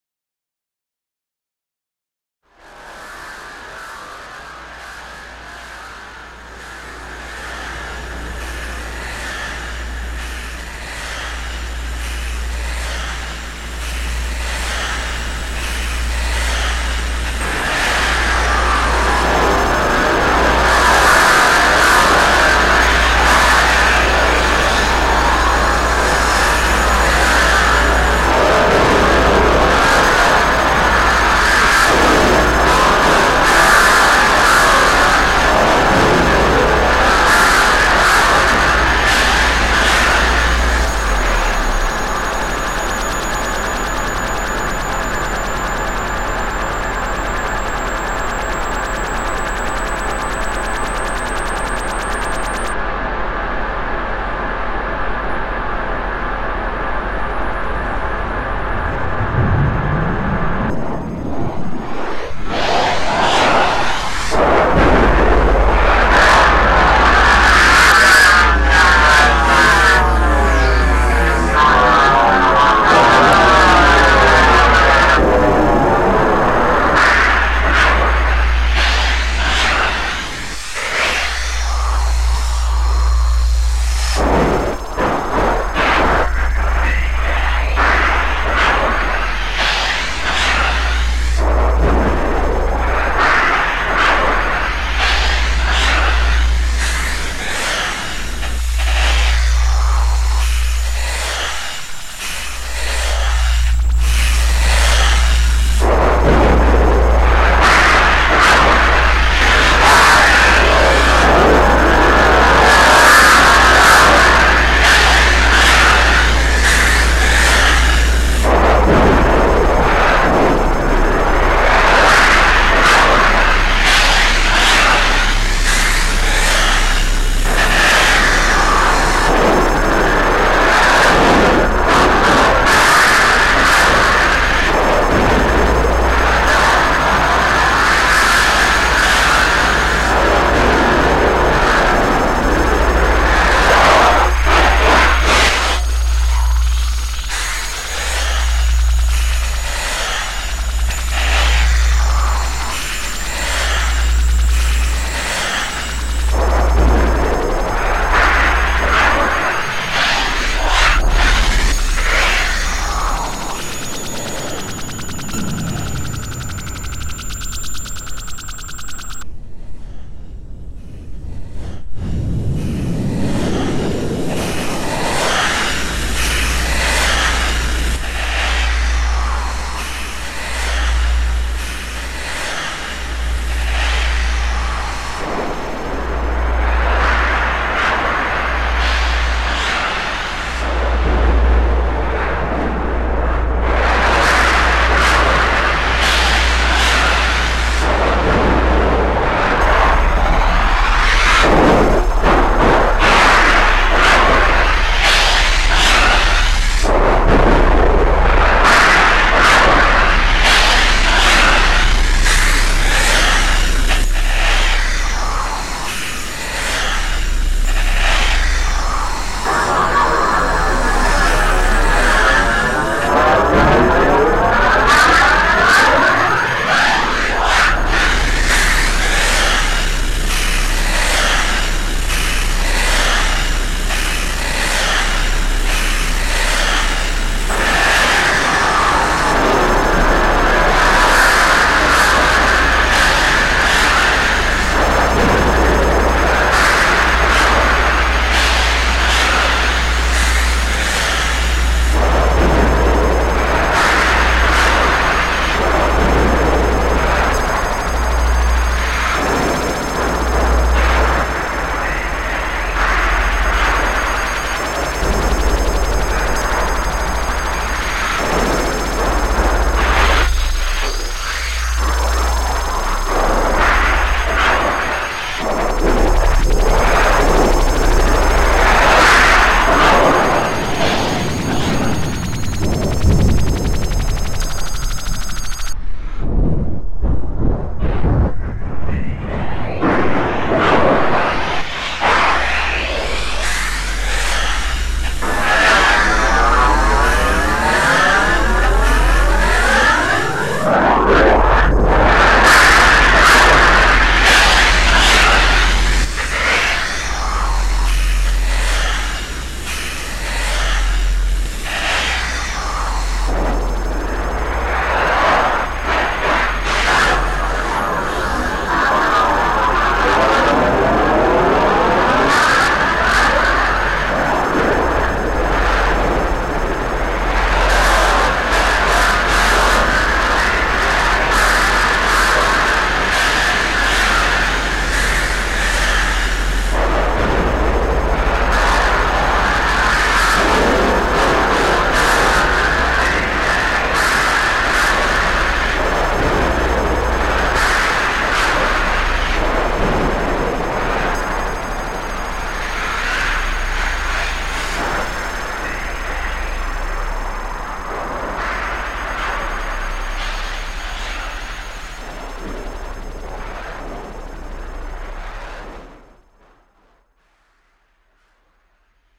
A recording I made with VCV Rack.
noise, digital, synthesizer, synth, electronic, atmosphere, harsh